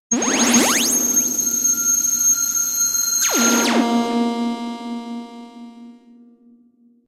A simple up-down siren.